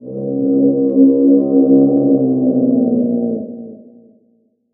rnd moan5
Organic moan sound
Ambience, Atmosphere, Creepy, Horror, Outdoors